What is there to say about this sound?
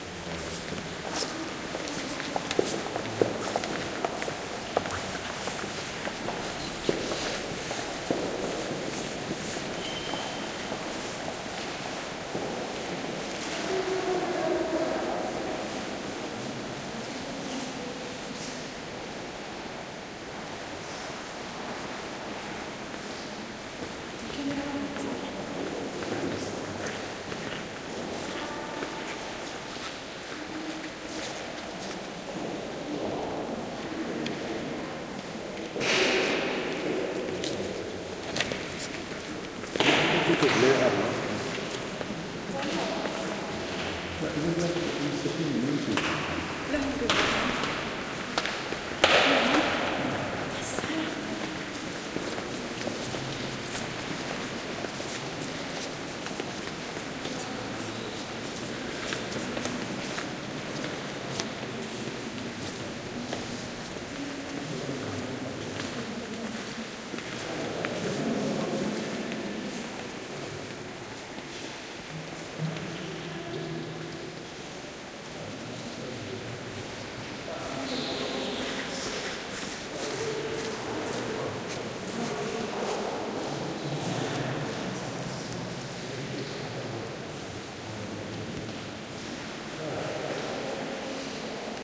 steps and soft voices in reverberant, half-empty large hall at Santa Maria de la Alhambra church, Granada, Spain. Shure WL183 into Olympus LS10 recorder